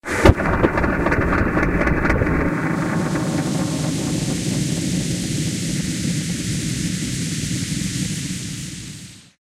trueno y lluvia
starting burst into a spin, filters and sound plugins, resulted in this strange sound of rain
rain, artificial, thunder